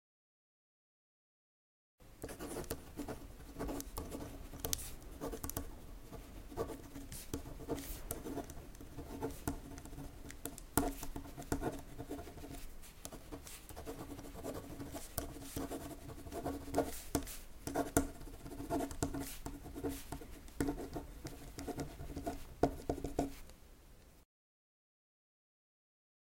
16 ballpoint pen, writing
writing down on a classic paper with a ballpoint pen